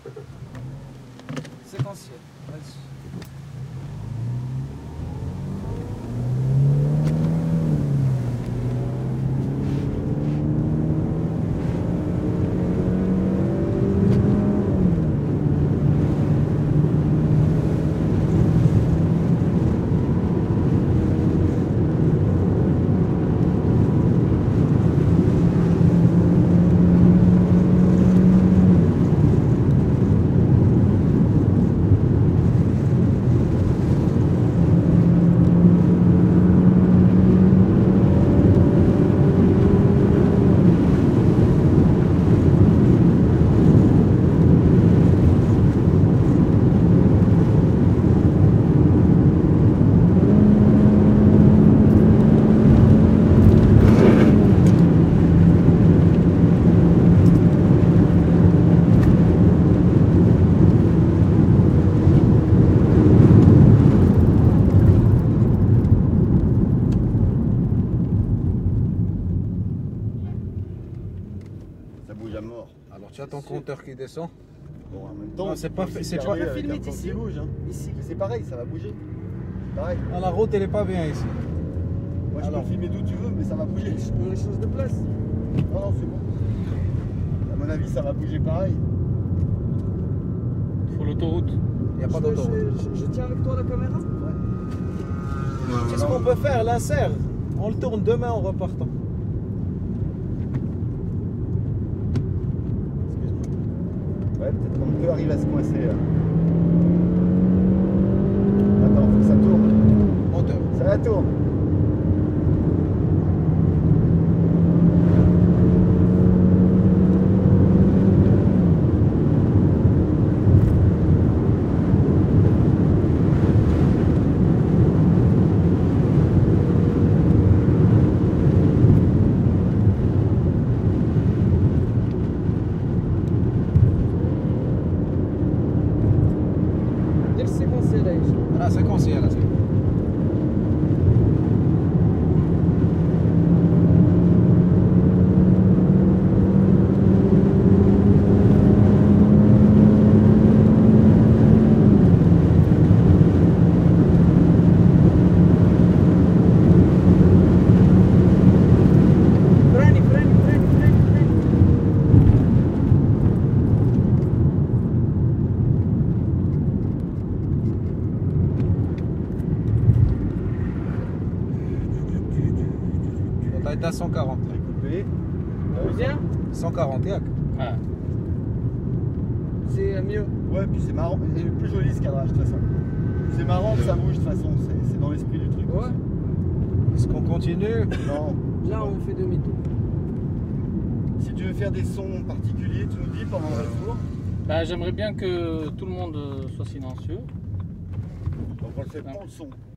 Int-Convertible-car01
This is a field recording of a convertible BMW, with rooftop down.
engine, convertible, bmw, car